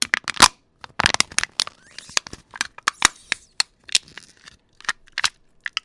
En Drink Crushed
Crushing a tall energy drink cans slowly by hand.